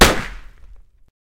Gun Sound 4
action, effect, gun, horror, sound, sound-effect